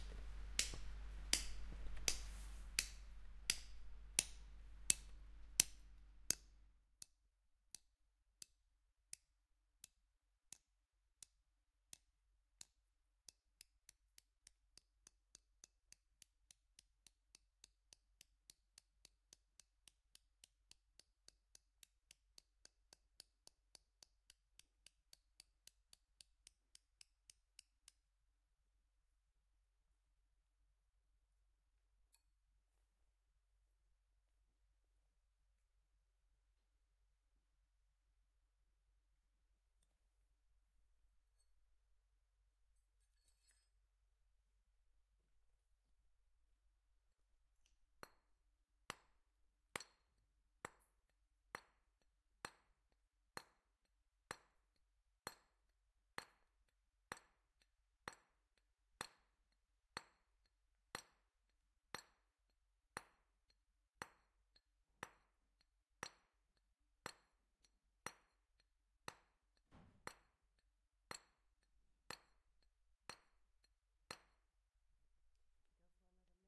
Stone Mason Workshop-003
York Minster stonemason workshop
carving, stone, masonry, stonemason